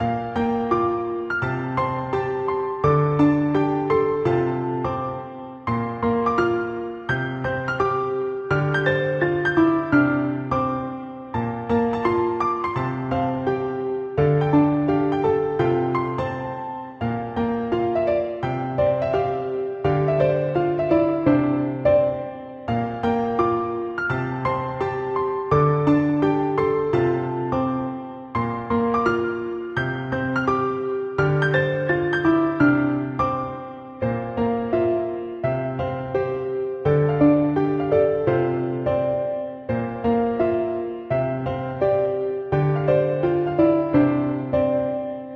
Made with fl keys, layered piano notes, can be used as anything you want.
Anime, background, beautiful, drama, dramatic, film, increasing, movie, piano, Relaxing, sakura